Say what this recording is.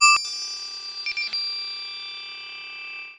PPG 009 Noisy Digital Octaver E4
This sample is part of the "PPG
MULTISAMPLE 009 Noisy Digital Octaver" sample pack. It is a digital
sound effect that has some repetitions with a pitch that is one octave
higher. In the sample pack there are 16 samples evenly spread across 5
octaves (C1 till C6). The note in the sample name (C, E or G#) does
indicate the pitch of the sound but the key on my keyboard. The sound
was created on the PPG VSTi. After that normalising and fades where applied within Cubase SX.
ppg, digital, experimental